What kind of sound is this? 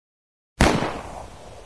Just a gun shot recorded using akg c414 and had some post processing. Use how you like